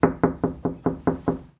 Knocking Wooden Door
Moderate/soft knocking on a wooden door
door, knock, knocking, wood, wooden